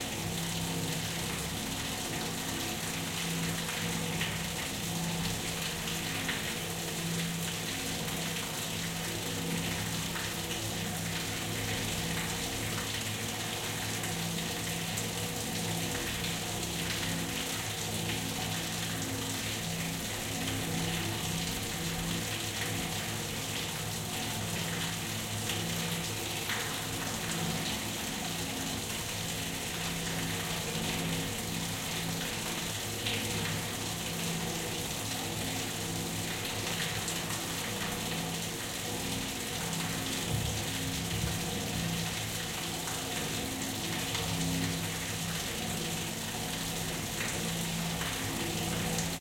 FX SaSc Heavy Water Splashes Canalisation Manhole Cover Splashing Far
Heavy Water Splashes Canalisation Manhole Cover Splashing Far
Canalisation, Cover, Far, Heavy, Manhole, Splashes, Splashing, Water